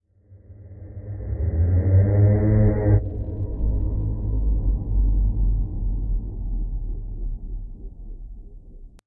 distant terror scream ambient sound effect 2